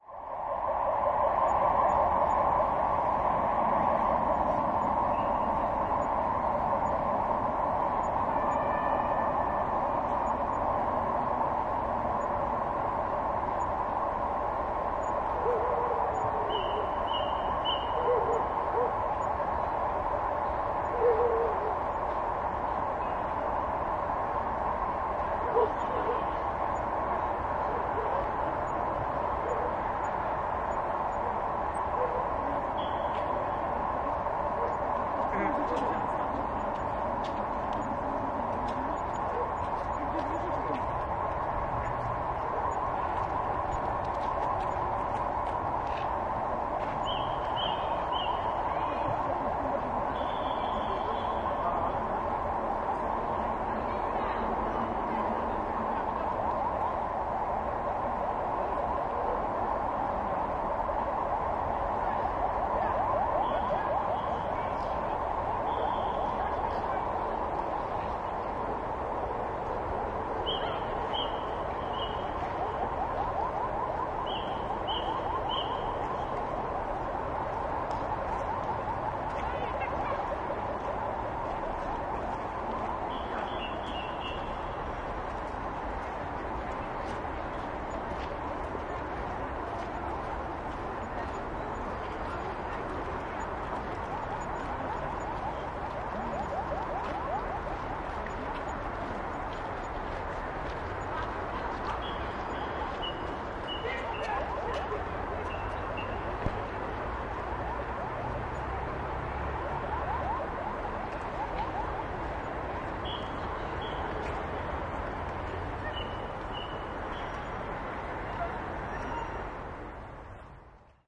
after the mystery of the Passion 19.03.2016 Poznań 001
General "after" atmo - sounds of cars, ambulances, returning people, whistles. Recorder - marantz pmd661mkII + shure vp88 (no processing - only delicate fade in/out)